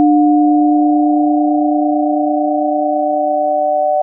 Guerard Karl 2012 13 son1
Audacity, mono, Synth